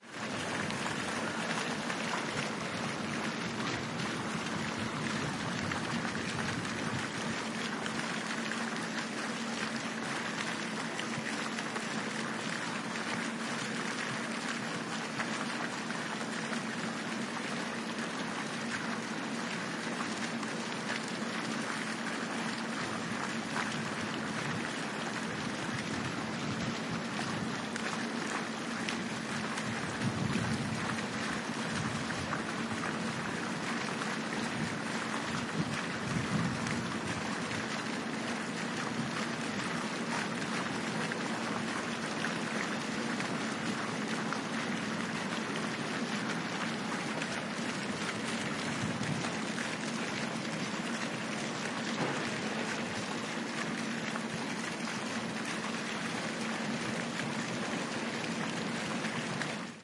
The sound of a fountain.
Recorded with a Sony IC Recorder
Postprocessed to cut low rumble in StudioOne3
Recorded on a sunny day at Acapulco.
Ricardo Robles
Música & Sound FX
water, field-recording, fountain